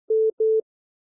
Call Waiting Tone
Pulsing tone made when you receive second call while you are on the phone. Call on the other line. Created from scratch using signal generators.
Call-Waiting, On-The-Other-Line, On-The-Phone, Phone, Phone-Call, Tone